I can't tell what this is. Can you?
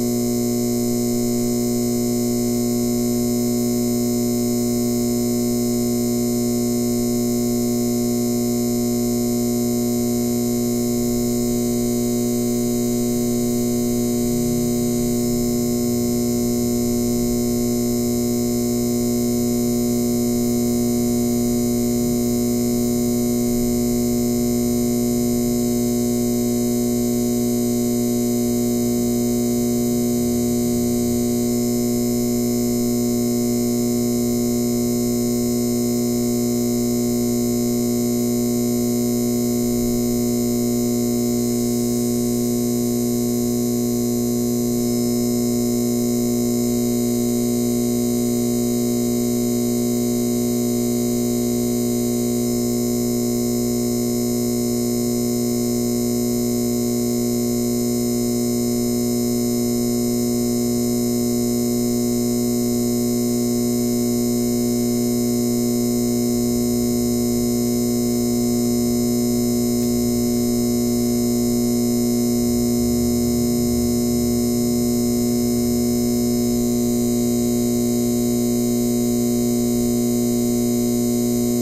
neon tube fluorescent light hum cu2
fluorescent, light